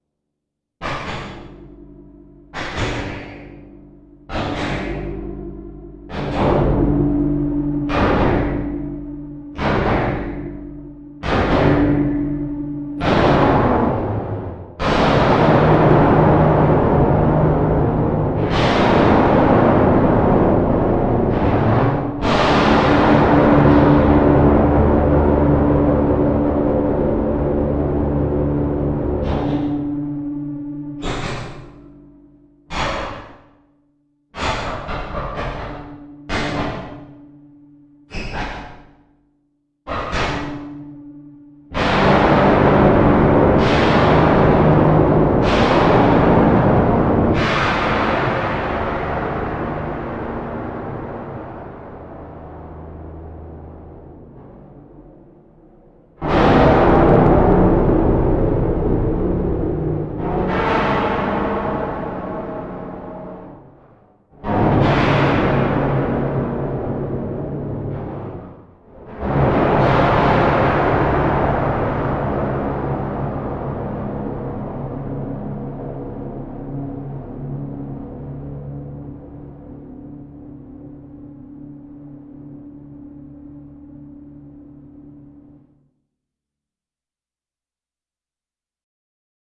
fear noise
Sometimes you hear a noise for no reason. Sometime about the noise makes you afraid, makes you want to run away, makes you want to crawl under the covers. This could be the noise.